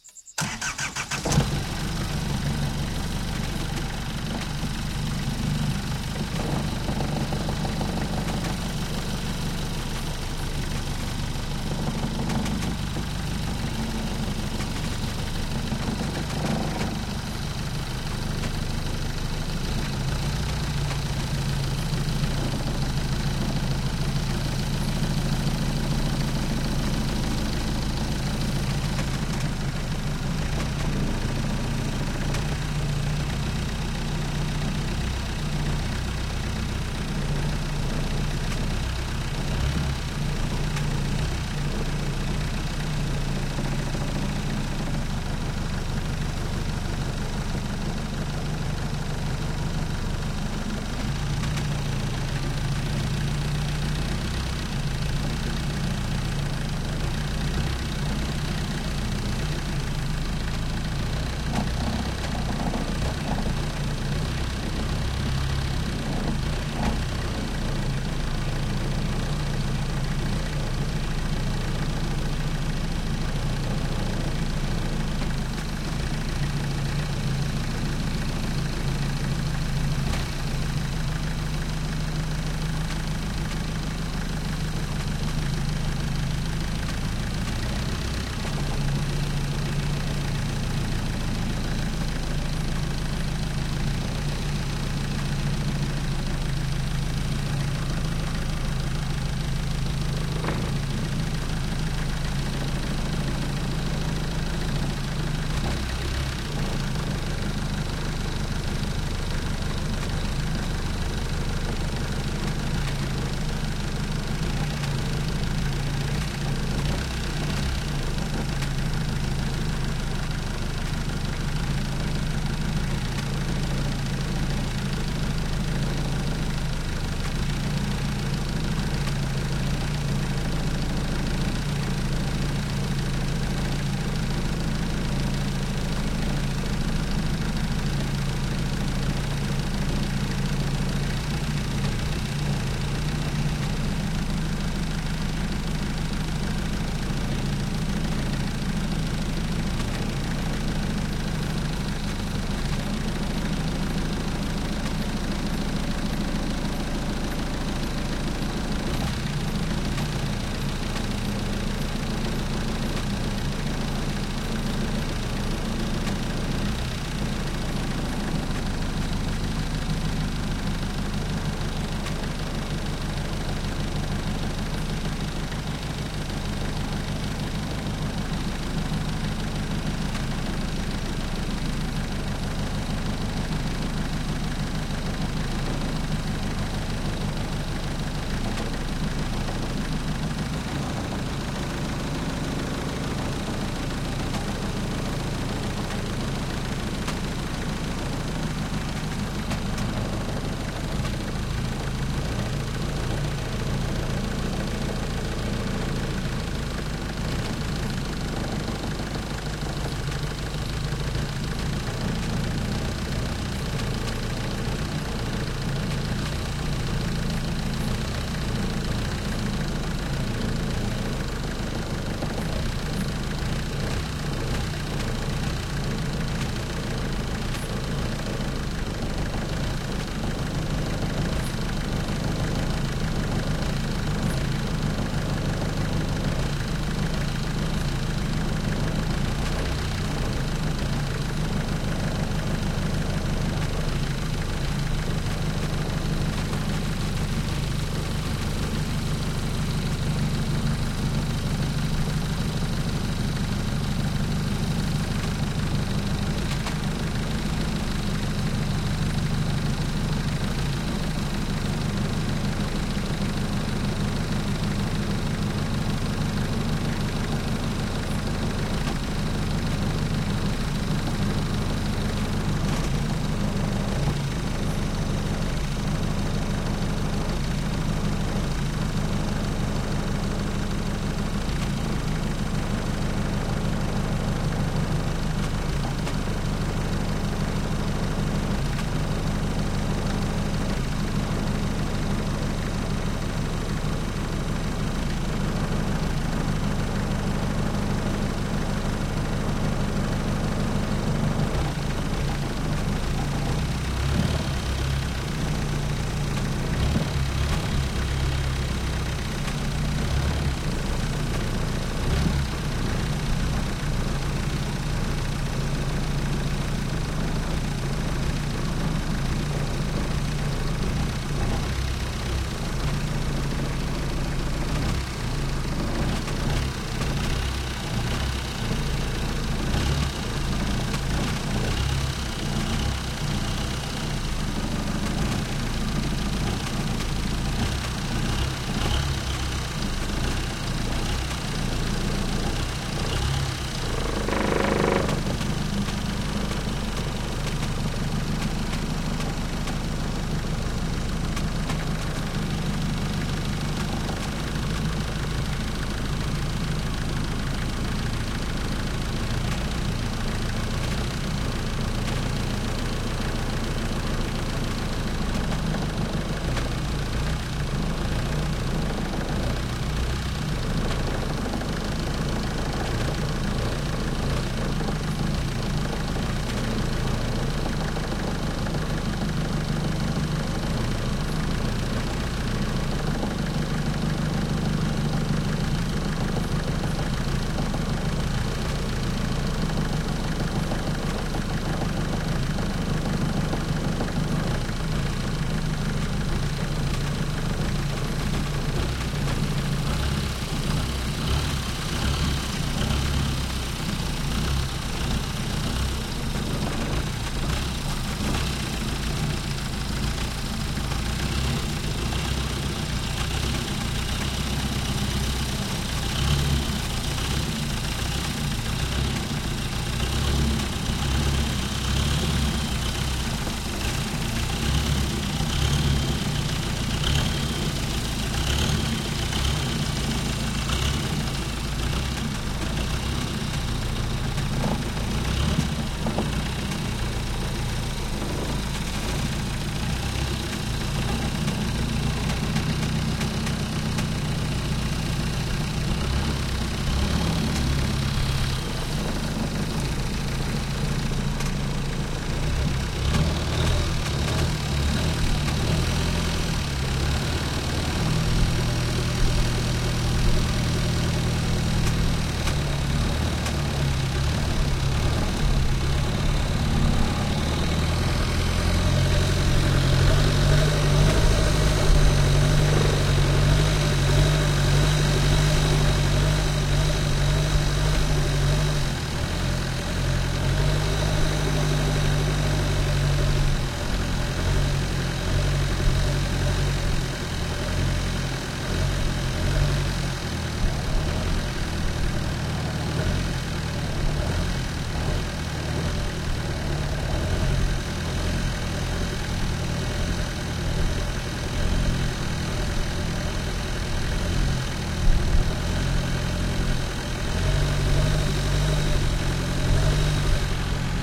Thailand longboat rattly motor on board drive slowly for a while, then gently rev at the end LEFT SIDE OFFMIC RIGHT SIDE ONMIC
boat, field-recording, longboat, motor, onboard, Thailand